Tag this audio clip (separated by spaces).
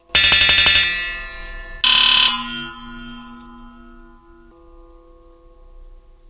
fragments lumps music movie melody bits toolbox